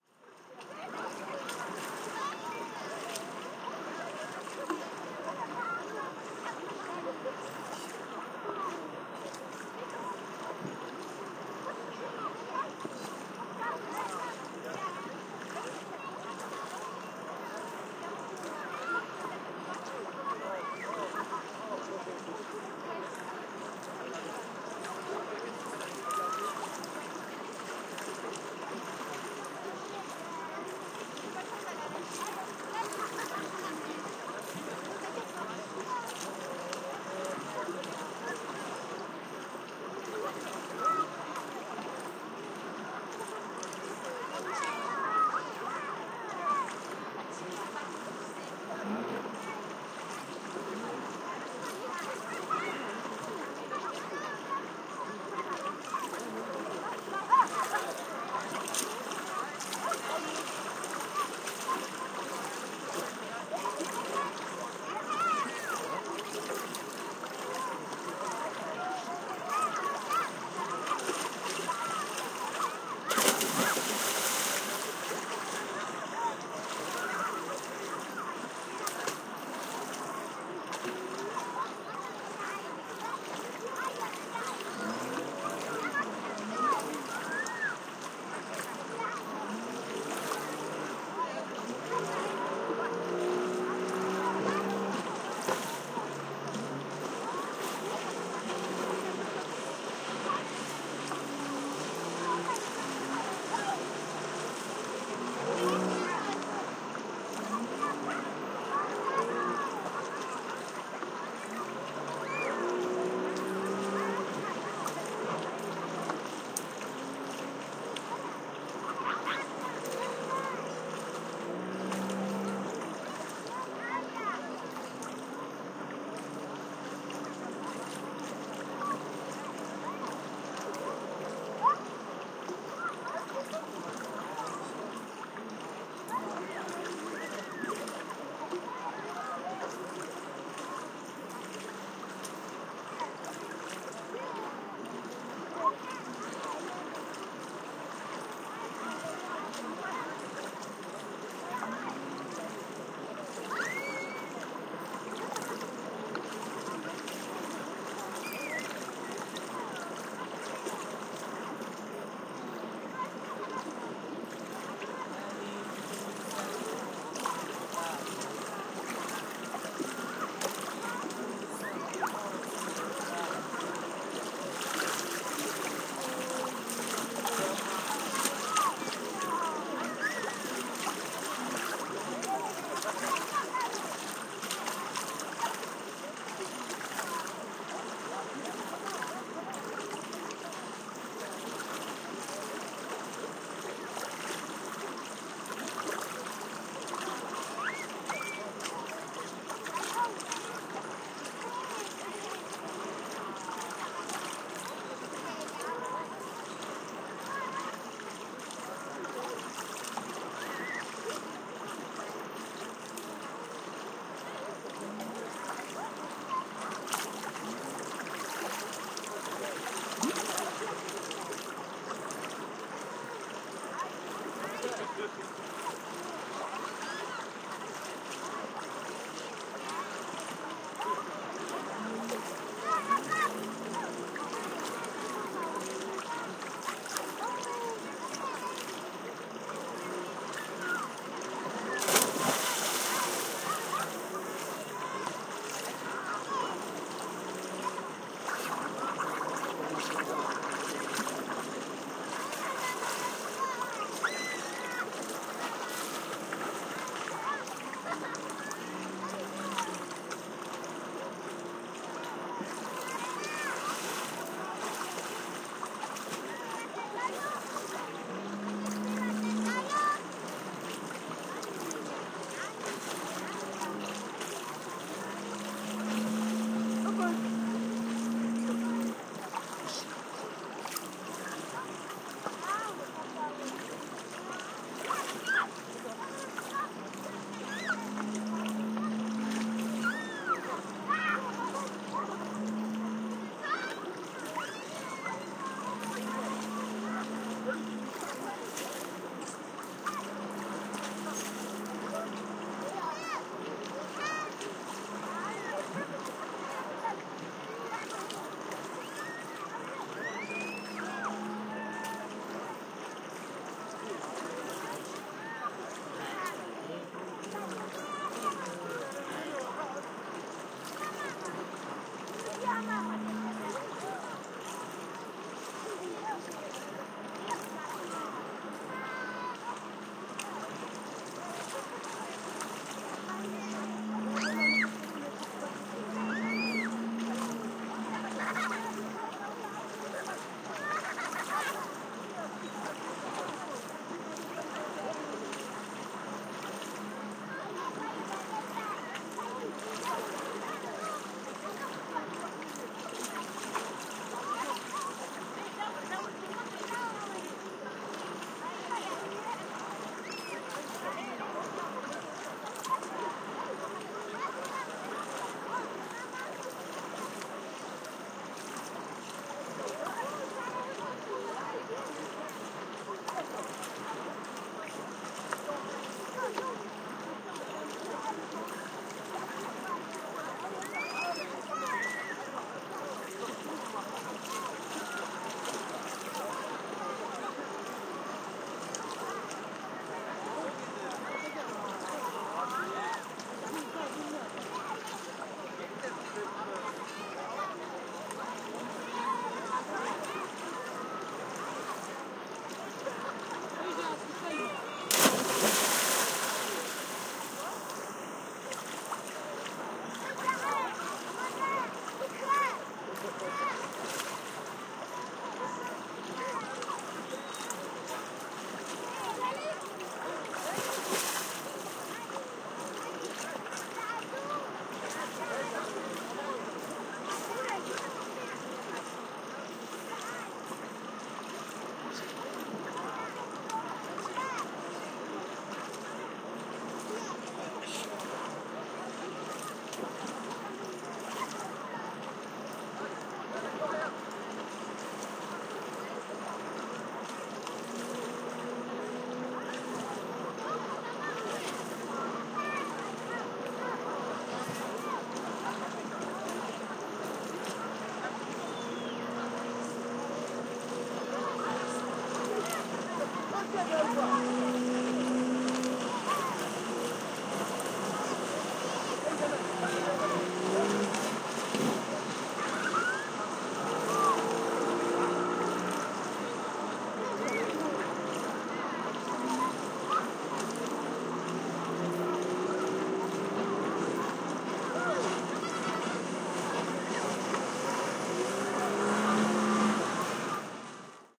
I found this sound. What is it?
seashore tunisia - beach atmo
beach, seashore, tunisia